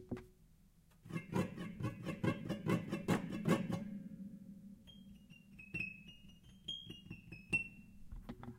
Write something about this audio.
piano sfx 3
playing pizzicato on the high piano's strings.